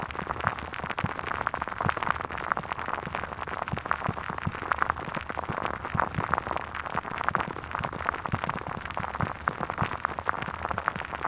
Rockfall (7lrs,grnlzr,Eq) 2
This sound is created from several samples with characteristic hits of stones on the surface. These samples were placed in a granular sampler. There were also manipulations with the pitch. In general, the number of layers is up to eight. Also in one of the sounds added low-frequency rumble.
rockfall, sfx, low, effect, stones, game, shudder, cinematic, sound, temblor, earthquake, ground, sound-design, seismic, tremble, sound-fx, quake, movement, fx, shake, rock-fall, stone, rumbling, foley, rattling, seismo, seism, texture, rumble, rocks